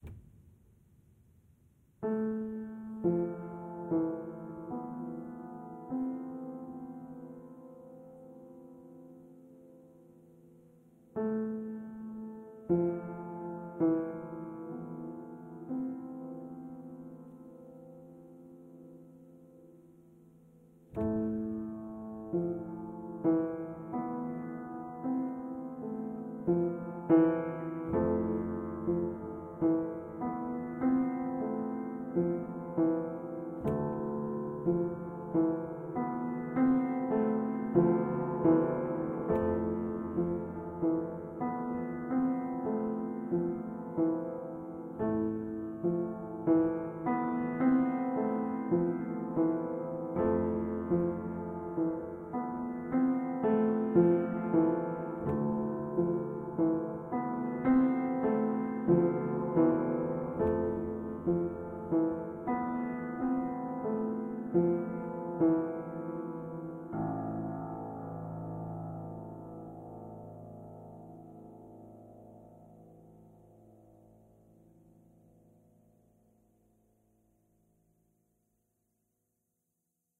Distant Sad Piano
This piece has been used in the mobile app game "rop" - be sure to check it out!
A beautiful simple piano piece with reverb. Can be used in a variety of different movie scenes to add a very dramatic or sad feeling.
Recorded with a Tascam DR-40 and it's built-in stereo mic system.
dark, piano, huge, cry, dreamlike, echoing, distance, film, experience, sad, pro, gigantic, dream, away, reverb, enormous, movie, simple, cinema, distant, compact, psychedelic, far, echo